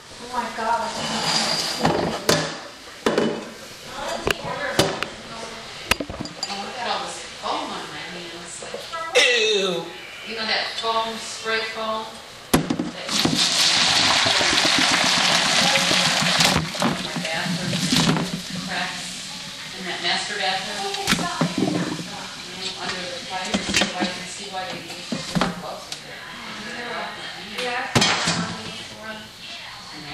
Close perspective of boiling pot on the stove during Thanksgiving dinner preparation recorded with a DS-40.